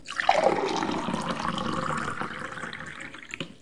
water glass
Filling a glass with water from a plastic filter jug. The click at the end is the lid closing.
Recorded with Rode NTG-2 into Zoom H4.
glass, kitchen, life, water, lunch, pour, gurgle